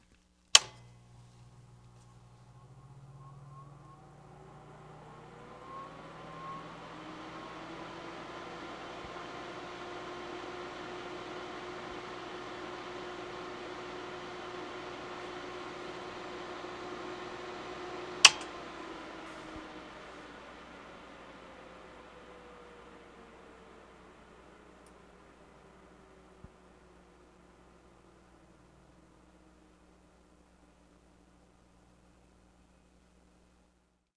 old sony tbc
An old TBC that hooks up to an old Sony 1" video tape recorder. Large switch click followed by a heavy duty fan sound. I also recorded the 1" open reel deck (which has multiple large fans) being loaded and run for a bit - more later.